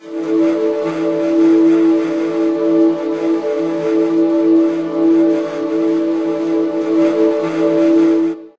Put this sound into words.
A semi-vocal pad made with granular synthesis, this has a "choirish" sound and some interesting texture and noise in the background. It has correct loop points so that it may be played indefinitely in a sampler.
ambient, choir, granular, loop, noise, pad, static, synth